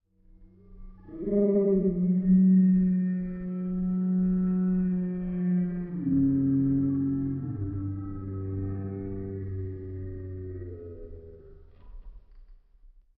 Processed Exuberant Yelp Howl 3
This is a processed version of the Exuberant Yelp Howl in my Sled Dogs in Colorado sound pack. It has been time stretched and pitch shifted. The original sound file was the happy cry of an Alaskan Malamute. Recorded on a Zoom H2 and processed in Peak Pro 7.
bark, dog, howl, husky, Malamute, moan, pitch-shift, sled-dog, time-stretched, wolf, yelp